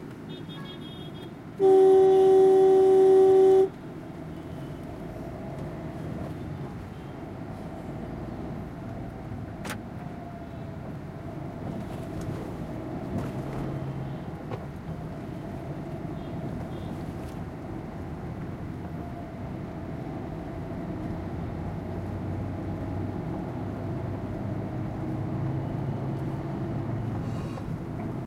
Car interior traffic 1
Sounds recorded from roads of Mumbai.